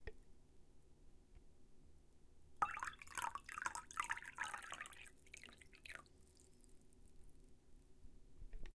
Water Being Poured into Glass
Water being poured into a Glass
Glass, Pour, Water